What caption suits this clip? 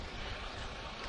capemay ferryloop terminal2
A loop from the sounds of the terminal on the Jersey side of the Cape May-Lewes Ferry heading south recorded with DS-40 and edited in Wavosaur.
boat, new-jersey, ocean